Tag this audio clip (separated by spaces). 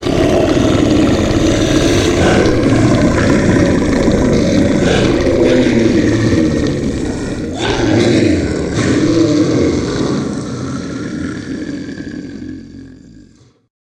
beast fearful growl grunt scary